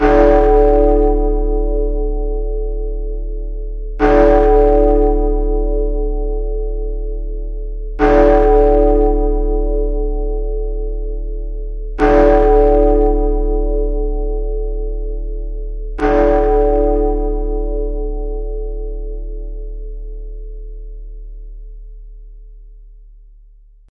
Tollbell 5 strikes
Large bell with 5 strikes. 4 seconds between strikes; long tail.
5-strikes, big-ben, five-o-clock, five-strikes, huge-bell, large-bell, tollbell